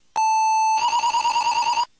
sample of gameboy with 32mb card and i kimu software